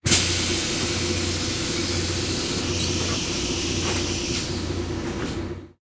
Bus-doors-sound-effect
bus, bus-stop, busstop, cars, traffic, transporation